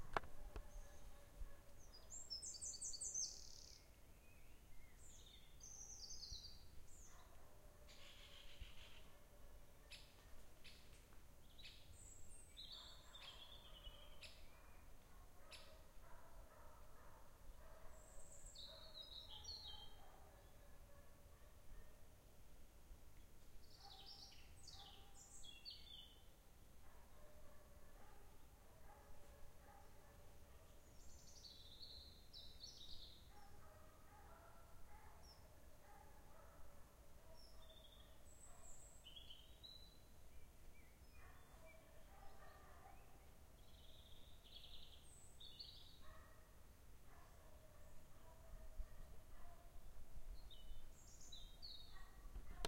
amb - cecebre 09 chu
amb, ambience, birds, forest, trees